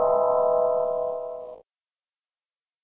A simple chord for menu sounds.
simple, chord